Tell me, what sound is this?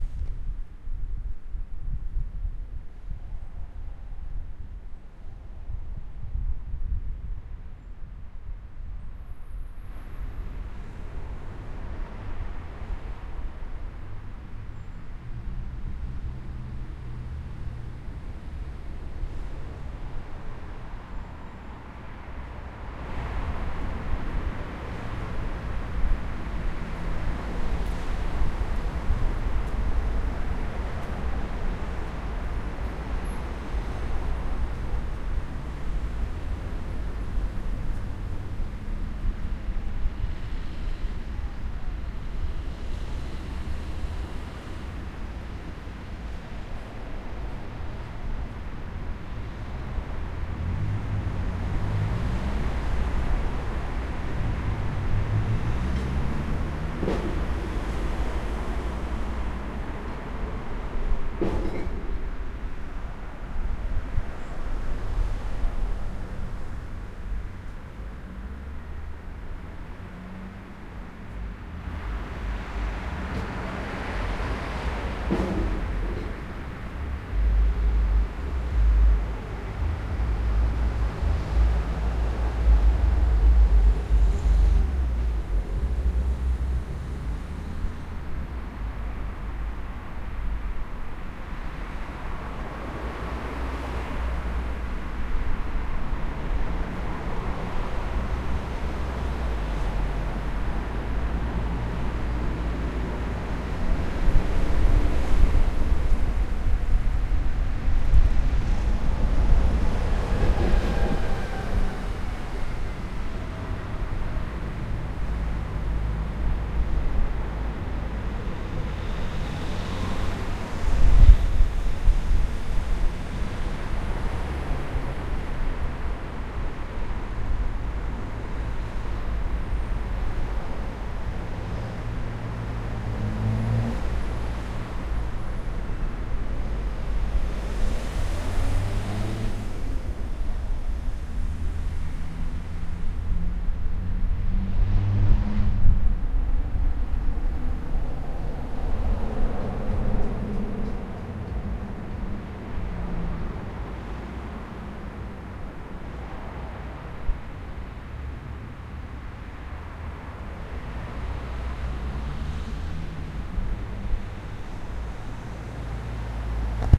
Cannock Soundscape.
Recorded on 12/05/2020 at 1pm.
ambiance, ambience, ambient, cannock, cannocksoundscape